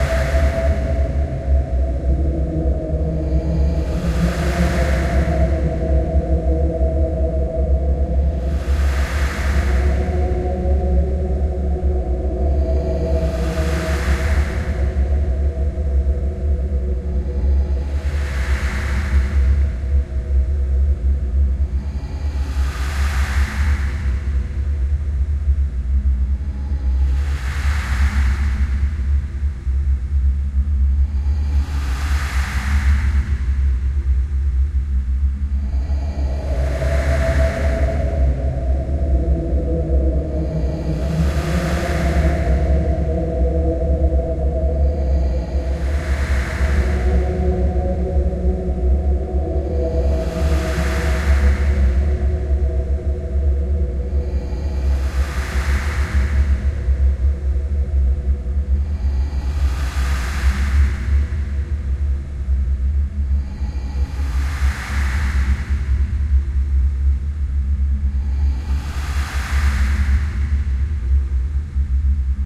Dark Ambience
A dark eerie drone made by messing around in Audacity with paulstretch.
ambience, ambient, anxious, atmosphere, creepy, dark, drama, dramatic, drone, haunted, scary, sinister, spooky, suspense, thrill